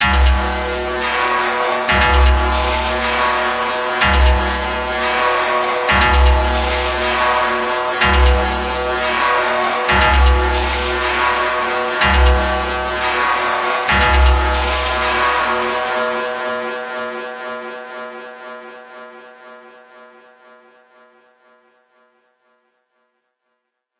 Metallic Chaos loop (120bpm)
The idea behind this loop was to make something that sounded highly metallic and rhythmic with a industrial factory like atmosphere. I achieved the metallic shine by mostly using Ableton's Resonator.